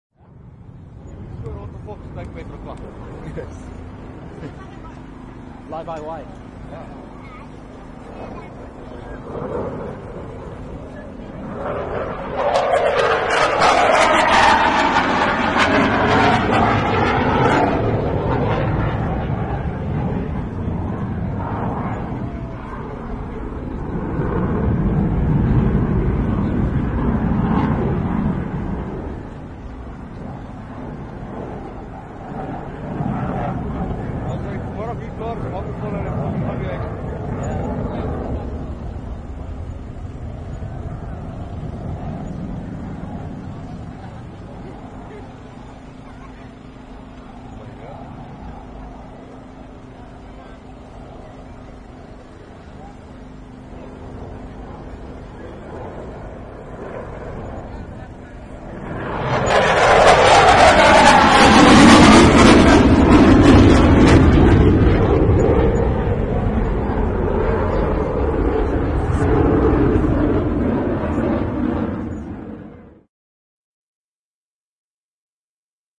Gripen flypast2
another flypast of the great Gripen super hornet.
sound-barier, jet, military, loud, air-force, fast